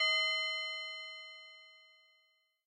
train crossing bell
single ding sound made with tubular bell